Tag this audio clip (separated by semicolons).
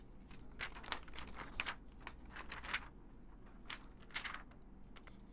newspaper; paper; reading